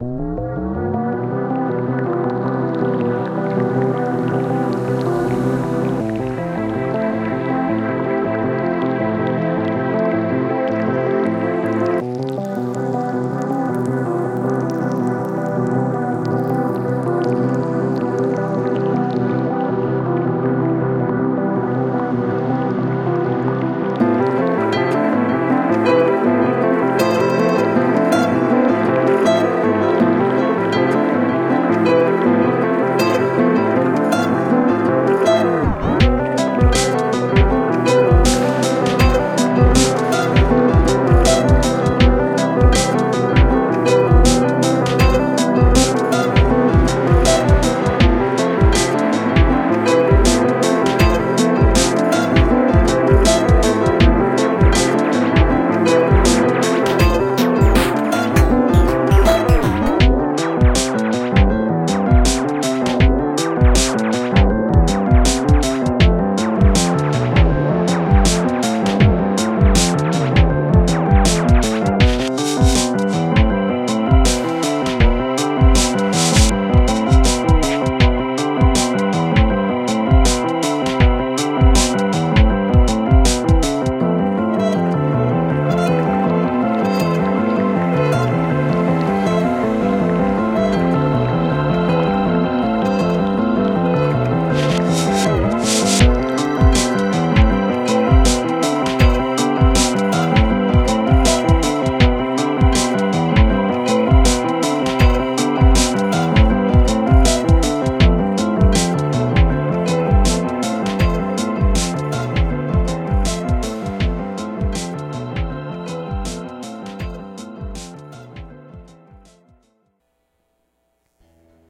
OHC 454 - Cavernous
Cavernous Cave Synth Beat Soundscape Glitch
Beat, Cave, Cavernous, Glitch, Soundscape, Synth